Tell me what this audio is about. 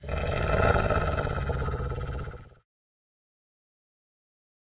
wolf-growl
A wolf growling. Enhanced with a subbass generator.
animals, bark, dog, wolf